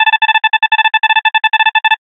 1 tone digits signal
beep, digits, code